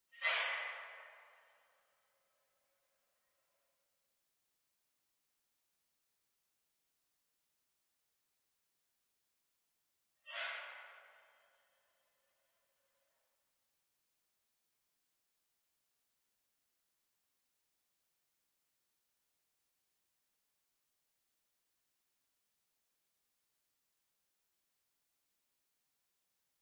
Metal impact Horror Effect 2
thrill, ambient, spooky, Metal, drama, dark, drone, creepy, horror, Impact, anxious, sinister, terrifying, terror, suspense